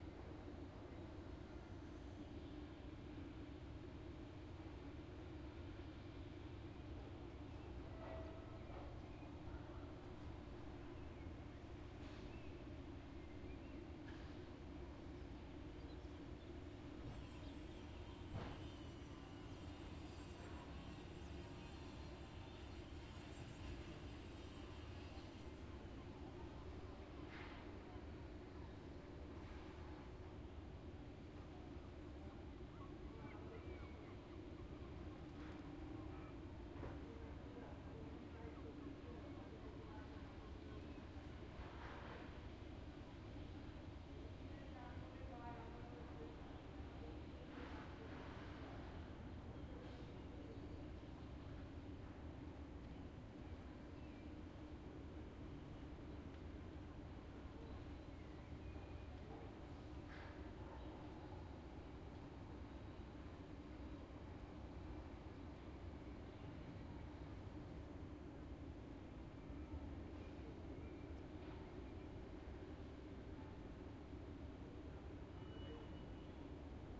Ambience Lisboa Center Daytime 4824 02
Lisbon's ambience recorded from my balcony.
ambience, ambient, center, city, downtown, field-recording, harbor, horn, lisboa, lisbon, people, portugal, rio, river, ship, soundscape, Tejo